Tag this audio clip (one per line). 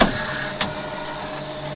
printer
roll
sound